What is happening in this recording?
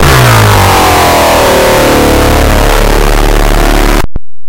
Monster Growl
4x4-Records, Ambience, Bass, Beat, Clap, Closed, Dance, Drum, Drums, Dubstep, EDM, Electric-Dance-Music, Electro, FX, Hi-Hats, House, Kick, Loop, Loops, Minimal, Sample, Snare, Soundscrapes, Stab, Synthesizer, Techno, wobble